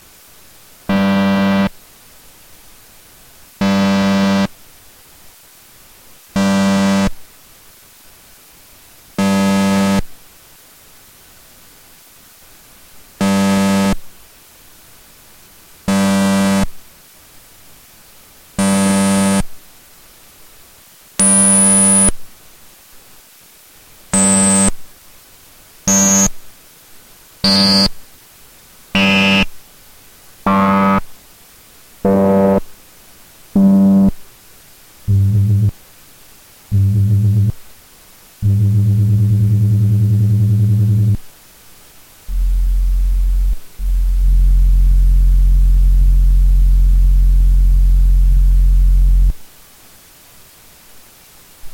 Beep Long & short
Monotron-Duo sounds recorded dry, directly into my laptop soundcard.
Sorry, this clip contains quite a bit of noise.
Several beeps, long and short. Made use of the filter.
Some of the sounds in this clip reach to very low frequencies.
beep, bleep, electronic, korg, monotron-duo, tone